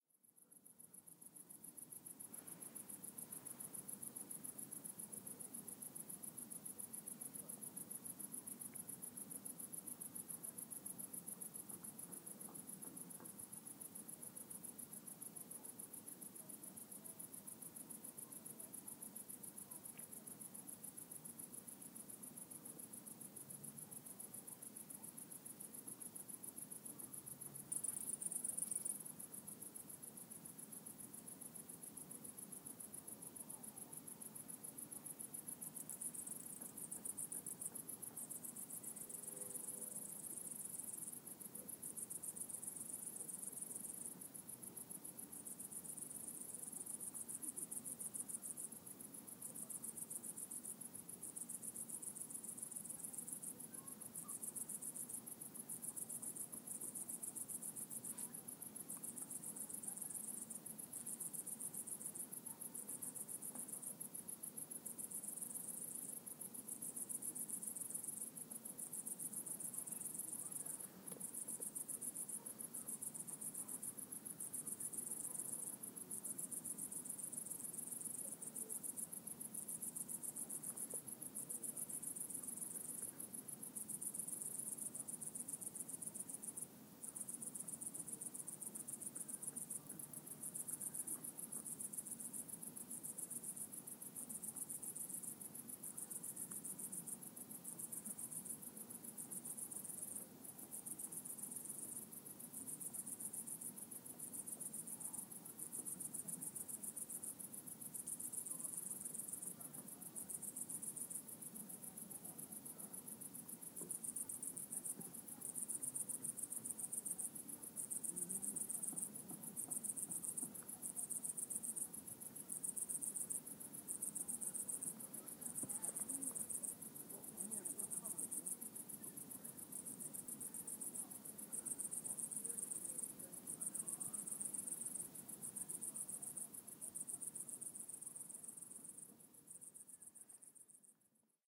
Crickets on the dune
Recorded at August 2012 in Stilo, Poland, on Sound Devices 744. Edited in Pro Tools, basic HPF was applied. I edited out some cloth noises as well as some talks. Some guy was hammering sth in large distance. Enjoy :)